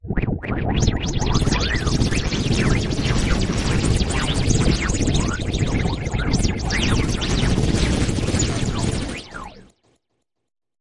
sfx6 ODSay
VSTi Elektrostudio ODSay + flanger + equalizer + multi-effect
vsti, sfx, fx, effect, Elektrostudio, ODSay